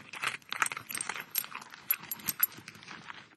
Recorded with an small Olympus recorder set on the ground next to an open package of crackers. This medium-large dog has a long mouth (like a echoing cave) and all her teeth, which makes for some hearty crunching sounds. There's a full longer version of her munching on the sleeve of crackers uploaded here too
Her collar tags jingle a bit too -sorry about that